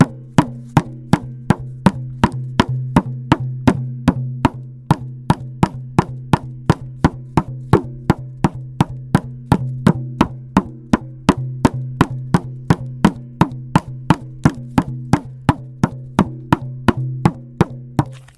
playing the drum
tocando no tambor
Gravado para a disciplina de Captação e Edição de Áudio do curso Rádio, TV e Internet, Universidade Anhembi Morumbi. São Paulo-SP. Brasil.
beat, beating, drums